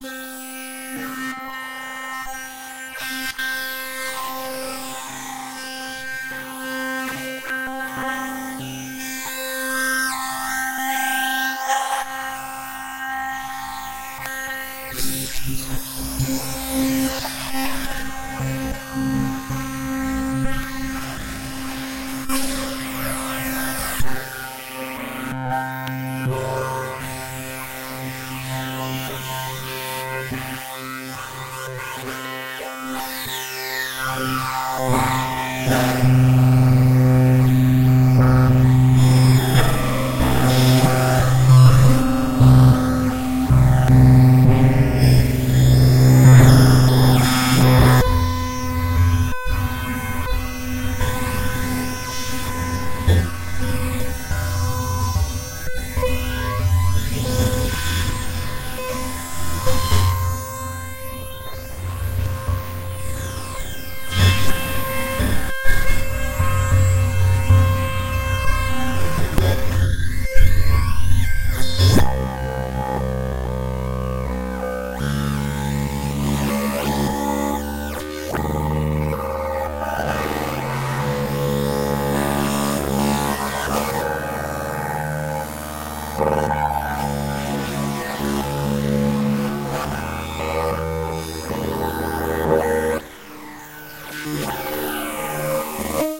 technogenic noise/hum (2/3) [synthesis]
a small, complex, dynamic drone created by automating the parameters of a free synthesizer for trance music (alpha plas t-forse)
is one of three samples